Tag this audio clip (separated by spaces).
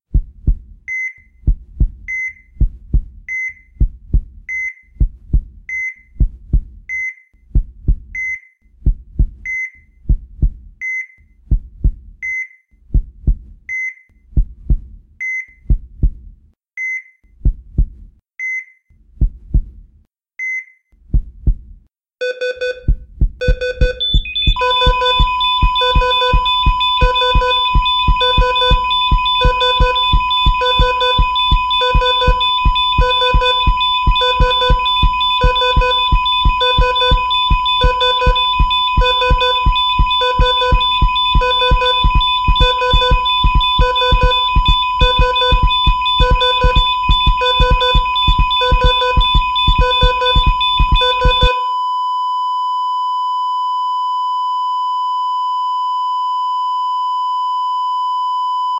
cardiac cardiac-arrest dead death dying ecg ekg failure heart heartbeat horror illness medicine poison shock sickness sudden-death